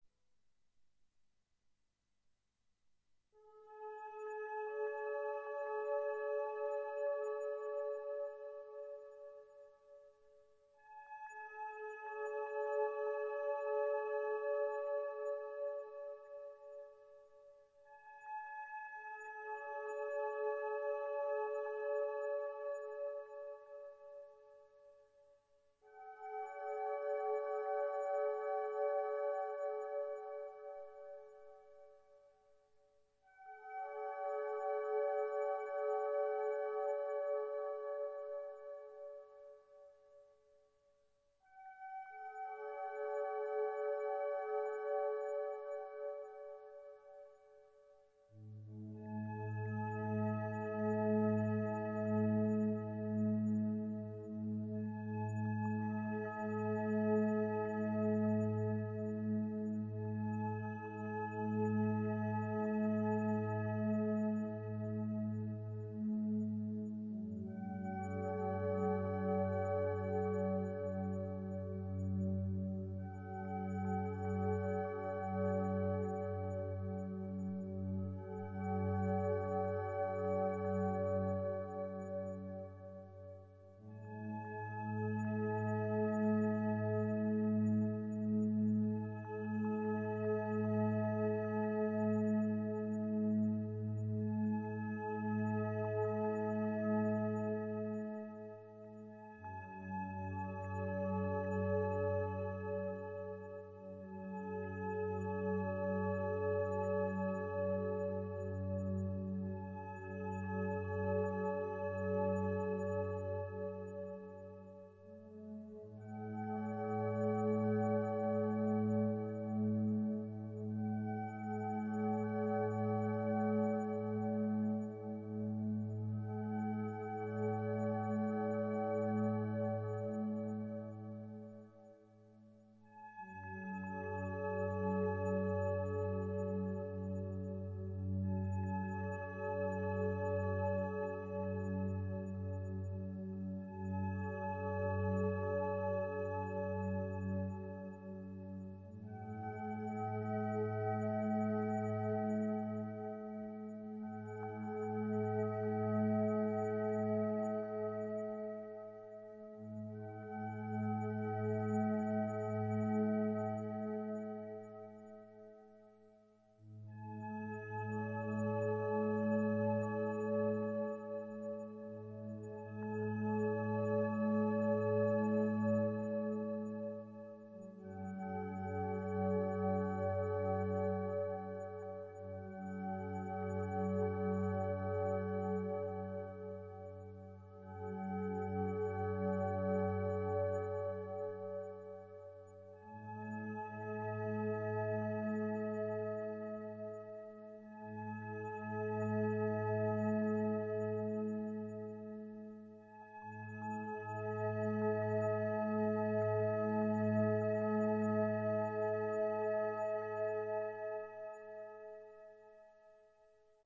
relaxation music #22
Relaxation Music for multiple purposes created by using a synthesizer and recorded with Magix studio.
music, atmosphere, synth, relaxation, ambience